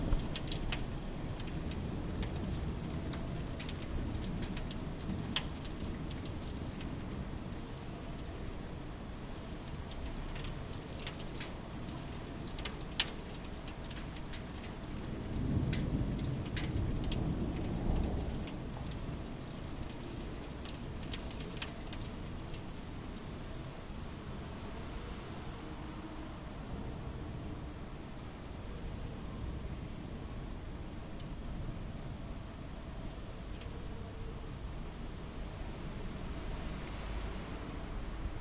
rain near ending
recorded at my house when rain, slowly ends
raining, rains, storm, thunders